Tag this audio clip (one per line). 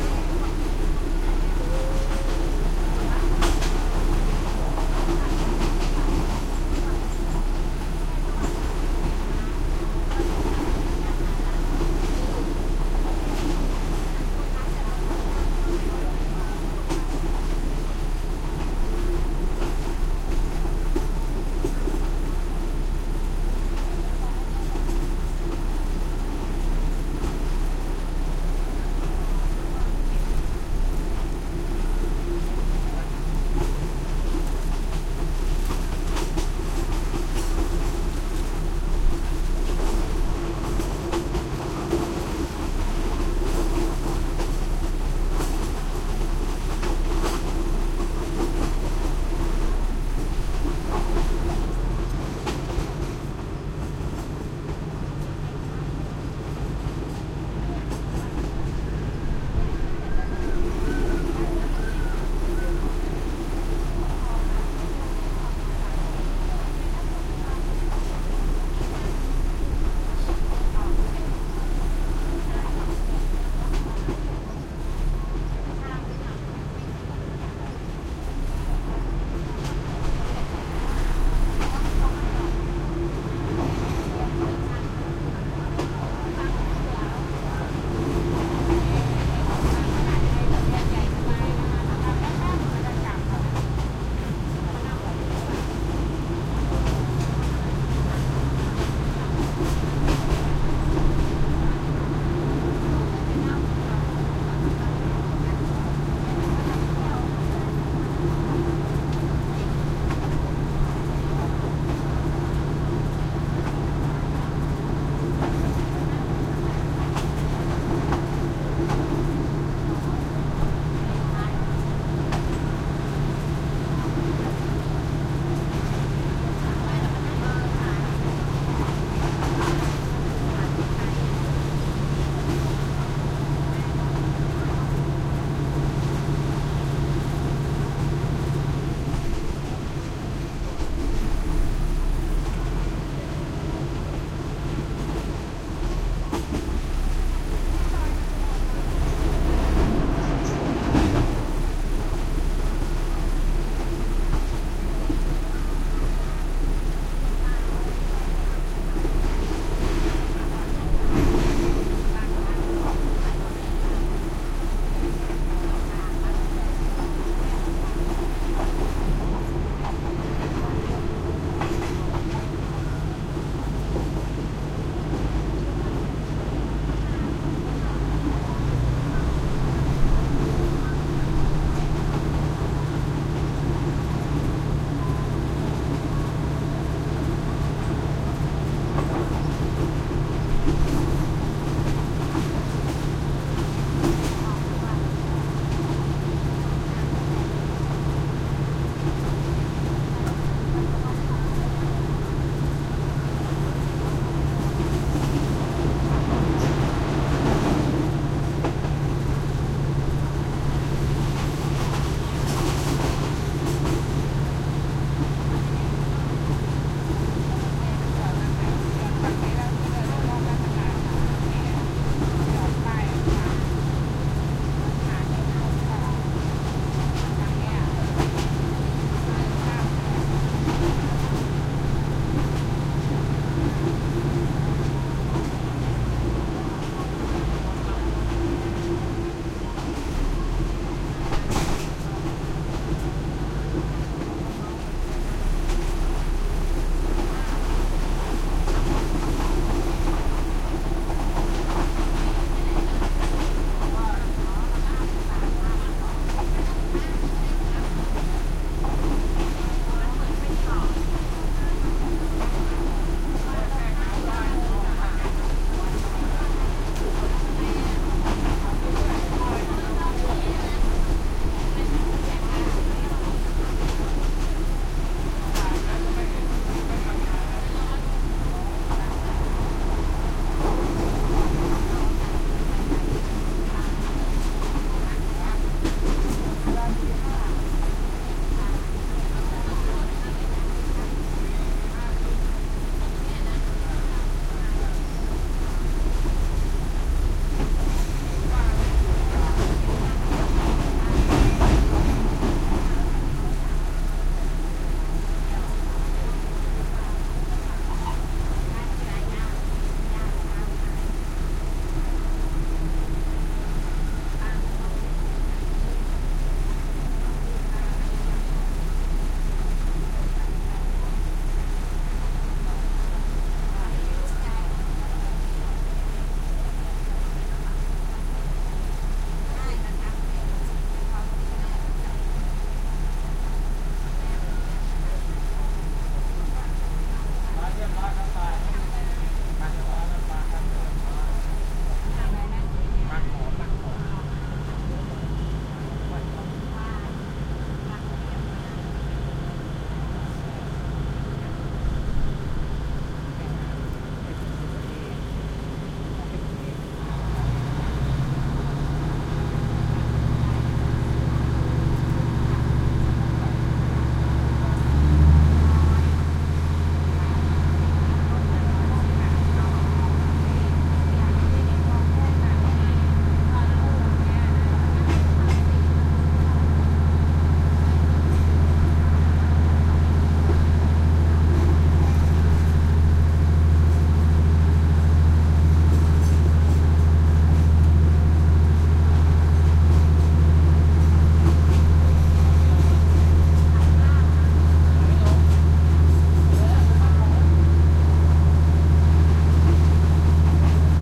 onboard
chatter
passenger
walla
Thailand
train
commuter
open-air